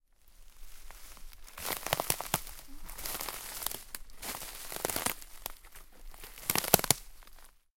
Walking on twigs
A recording of me walking on old, dry twigs in the forest.
crackle, ambience, snap, branch, twigs